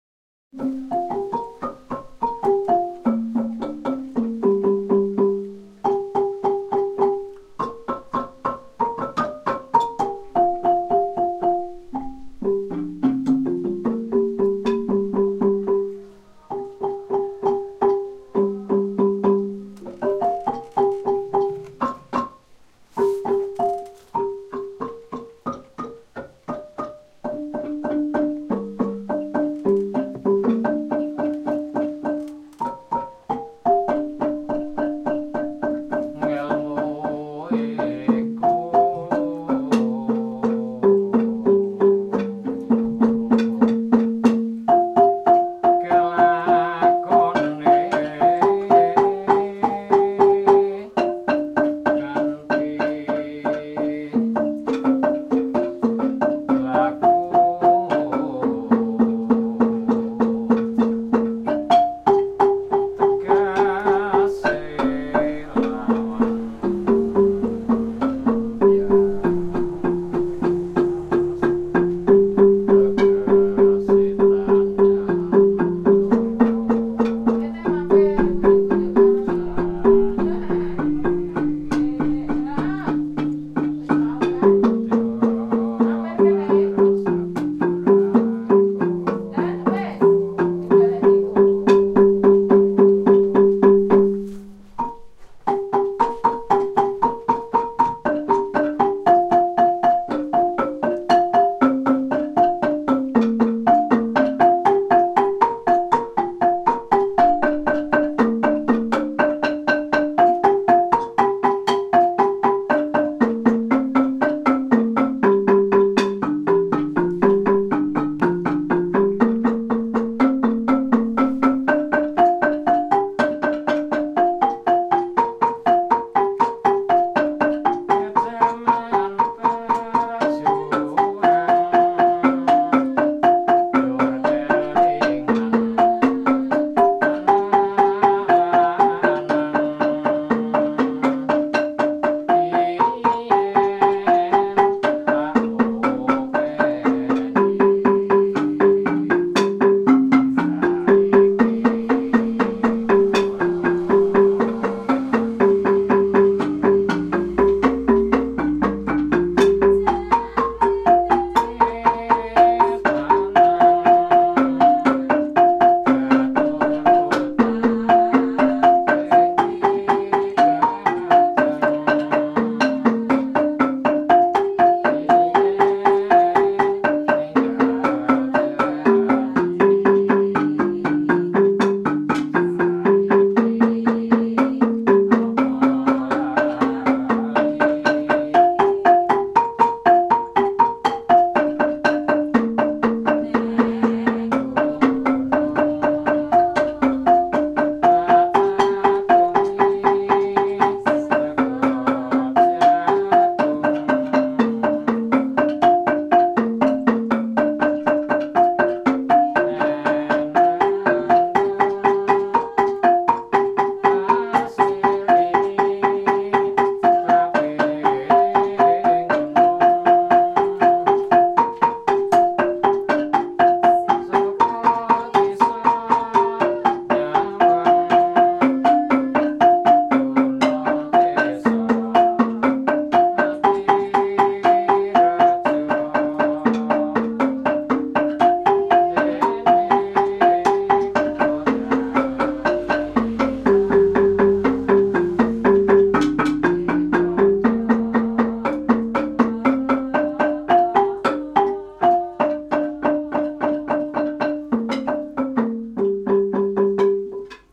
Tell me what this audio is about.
Javanese Angklung Music – Indonesia
Local Javanese musicians play folk music featuring angklung and voice. Recorded, in a village outside Borobudur, Java, Indonesia.
angklung, Asia, Bahasa, bamboo, Borobudur, Buddha, culture, field-recording, folk, folk-music, Hindu, Indonesia, Java, Javanese, local, music, percussion, singing, song, traditional, village, vocal, voice, xylophone, Yogjakarta